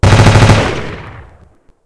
Machine Gun
I made this sound by editing a single gunshot.
Ballistic, Machine-Gun, Shots, Gunshot, Explosion, Automatic, Ammo, Shotgun, Auto-Cannon